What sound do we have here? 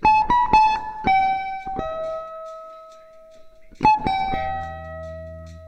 nice solo guitar with a friend